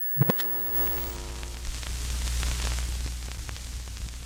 Sound effect of a TV turning on to a static channel.
This sound was created for the video 'LAS AMISTADES - Novela Mexicana' from CANES Produções.
You can use this sound in any way you want.
blip, noise, static, television, turn-on, tv